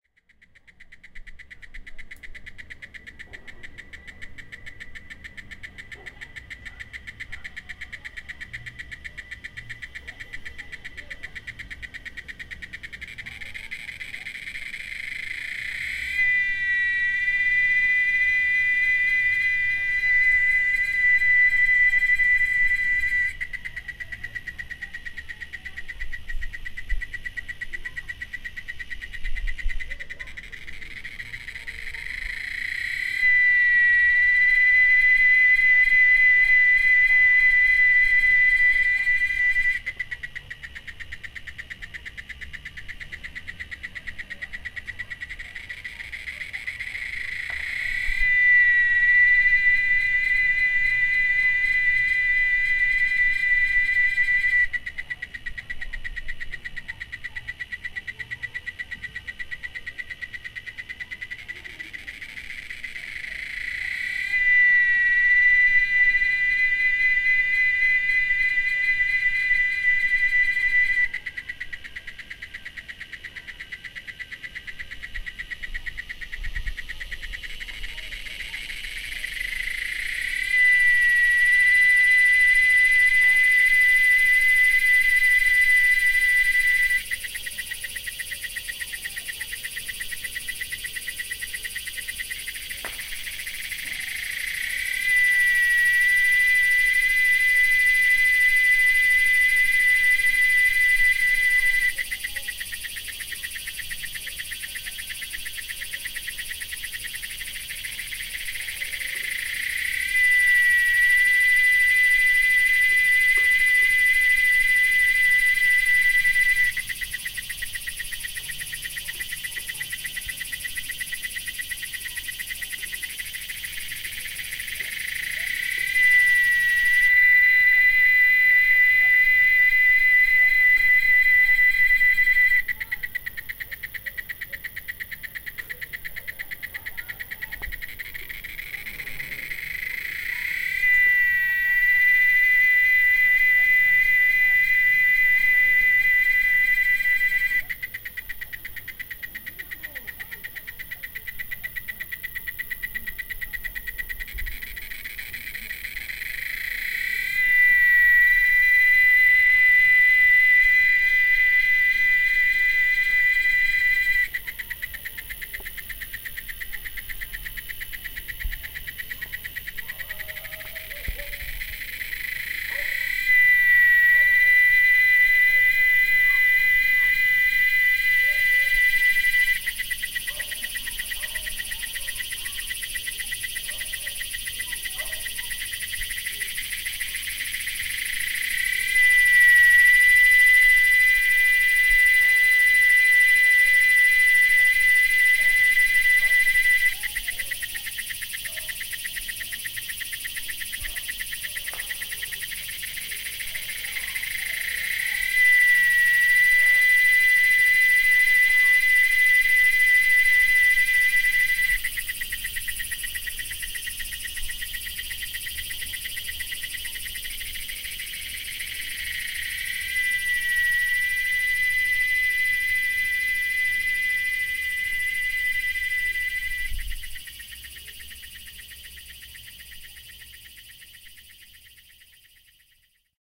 FR.CTC.08.CoyuyoS.007.B
intense cicada (Quesada Gigas) ambience